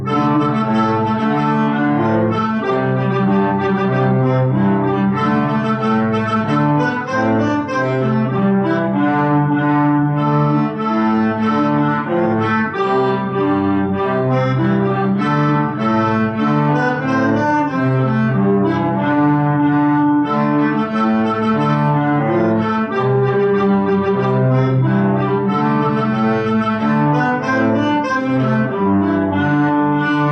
Pirate band performs "Drunken Sailor"
Amateurish and tipsy trio of pirates perfom "Drunken Sailor". Musical atmosphere for pirate ships, pirate bars...
accordion, ambience, band, cello, deck, drunken, music, pirate, pirates, sailor, ship, strings